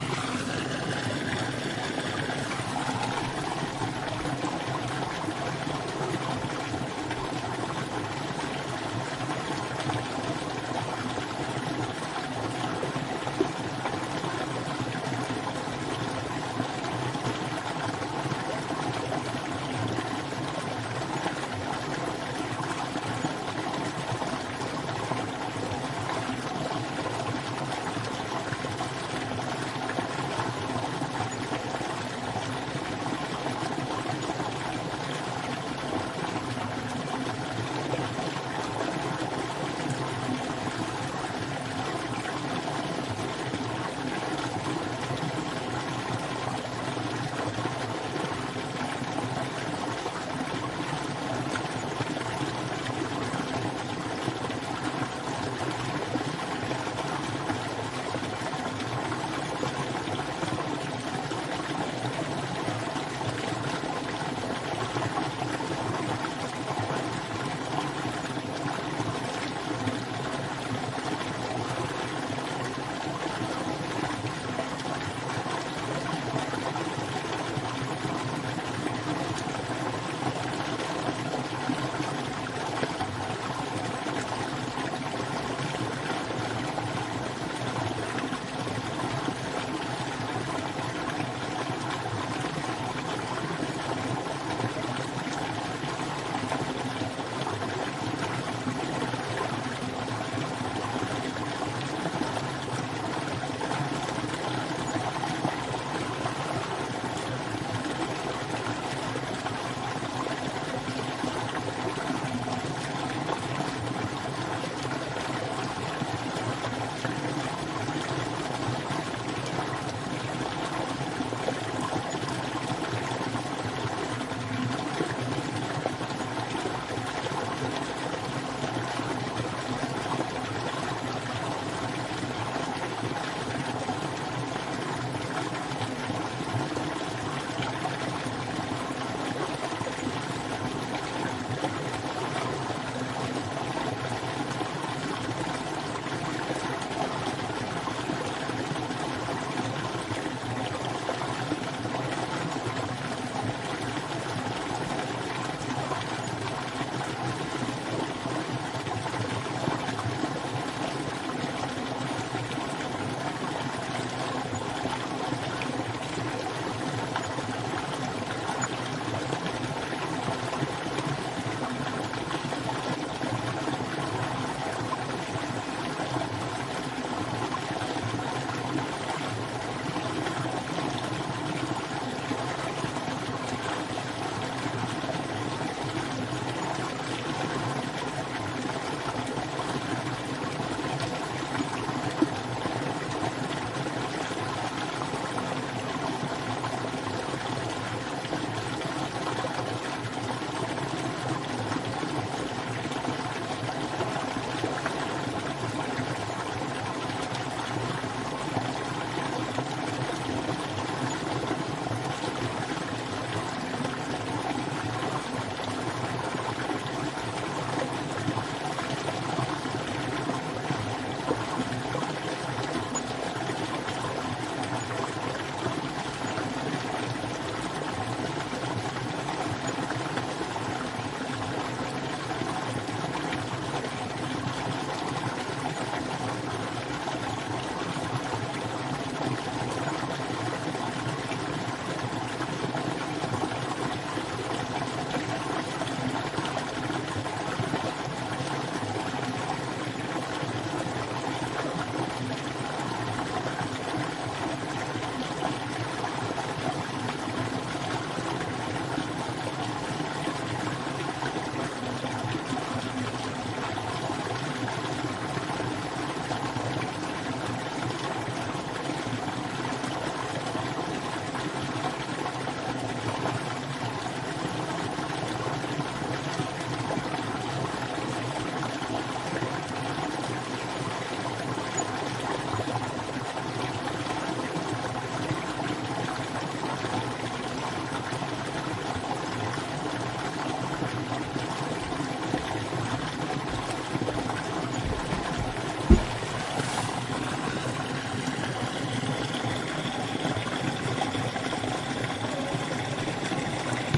deep, a small stream in the woods front
deep, small, stream